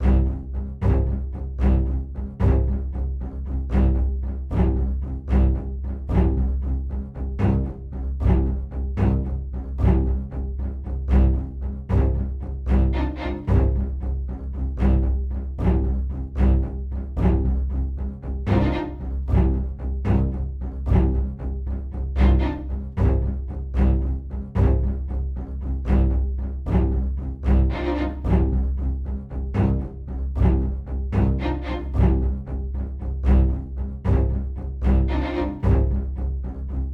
Tense cello playing for a tense situation.